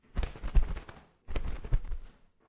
Content warning
Birds flying. Flapping wings.
Pájaros volando. Batiendo las alas.
Birds, Fly, Pajaros, Volando, Wings